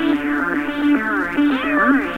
hf-7301 110bpm Tranceform!
Similar to 7300, but more treble, and some phasing. Looped @110bpm approx. Made with TS-404. Thanks to HardPCM for the find, this is a very useful loop tool!